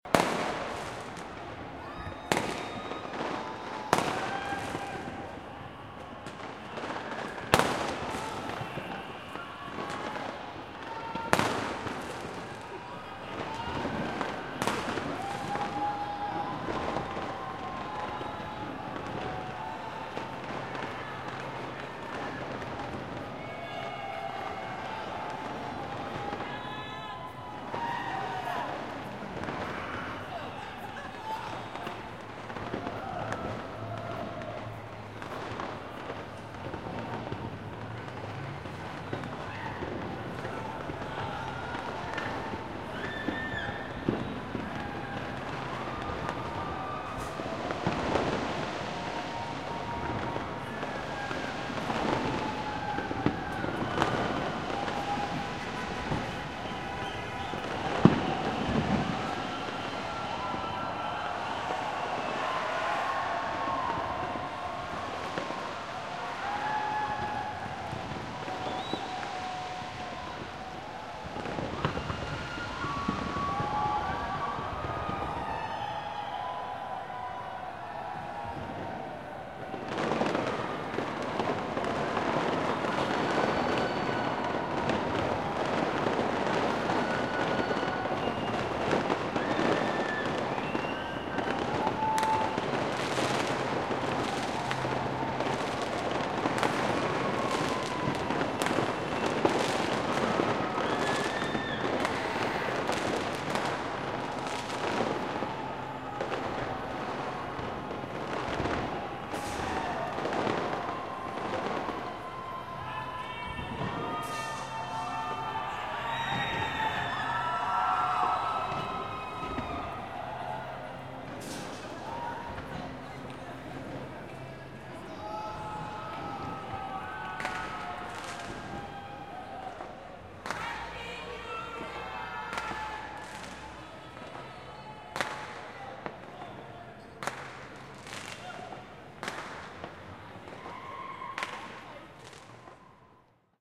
New Year's Fireworks Crowd
Apartment street party revelers celebrate the new year, watching the Auckland Sky Tower fireworks display.
year, drunk, new, crowd, happy, fireworks, cheers